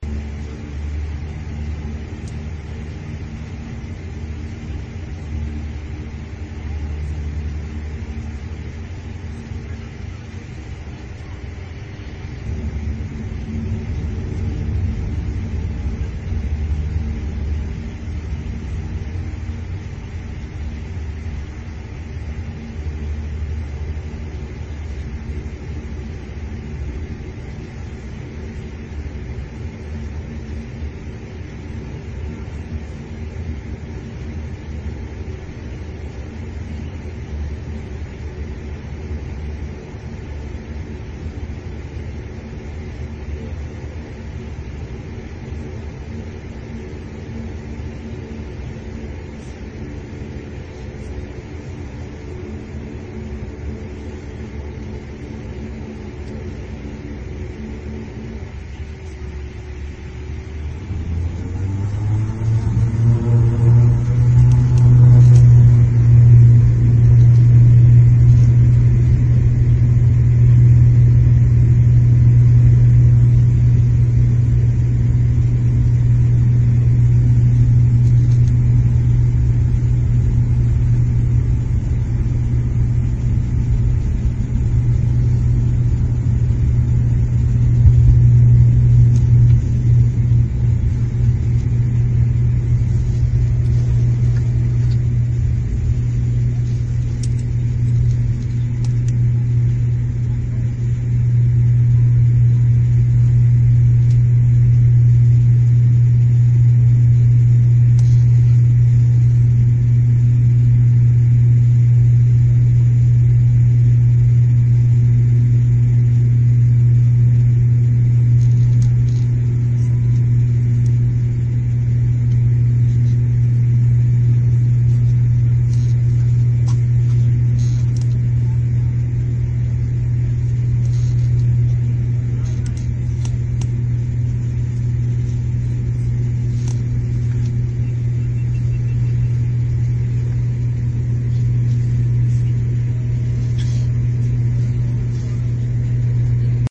Fokker 50 Turboprop Aircraft Taxi and Takeoff
Field-recording from inside a Fokker 50 passenger turboprop aircraft during the taxi and then spin up of the propellers during takeoff and climb to cruise. Some light voices in background.
Cabin-Recording, Field-Recording, Takeoff